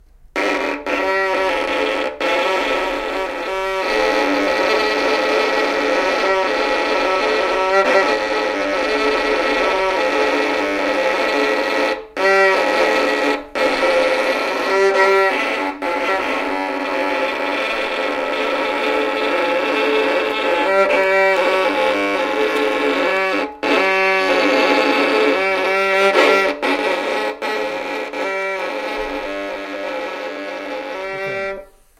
Violin scratch
Recording of a Violin
Violin, Acoustic, Instruments